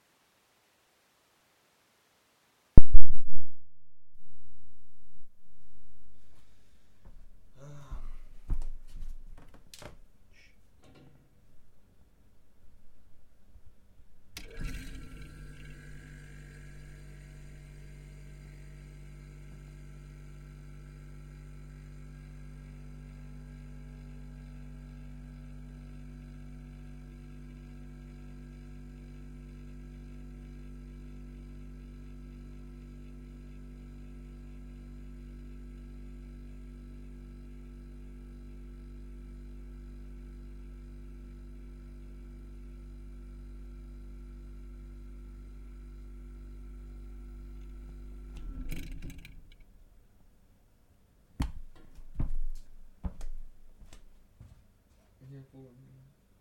Old soviet fridge.